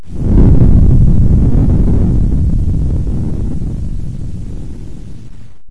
This is explosive
A handcrafted sound of a distant, cinematic "boom". Useable for anything you like. Made in SimSynth v1.3.